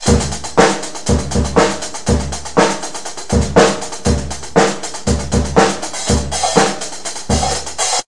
In this recording you can hear me playing the drums. It is a very bad recording because my equipment is not the best at all and I recorded down in my cellar where the acoustic is not very good!